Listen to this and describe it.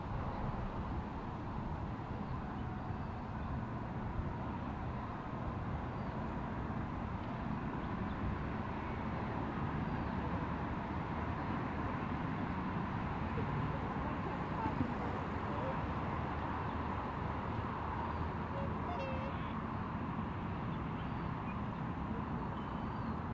City Noise from Lake at kingston
Recorded at Kingston Foreshore at Lake Burley Griffen in Canberra on a windy day - people walking and riding past, peak hour traffic going over the bridge, some birds noises and a black swan approaches at the end to get some food.